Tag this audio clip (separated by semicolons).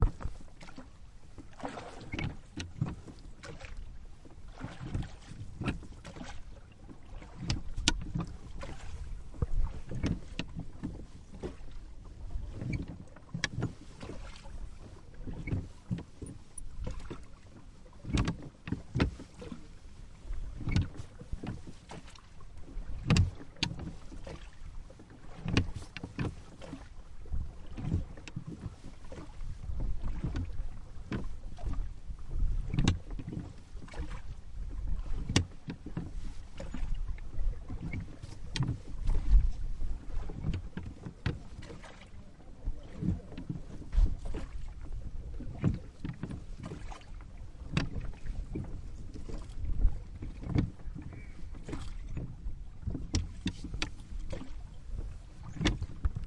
boat; creak; river; rowing; rowlock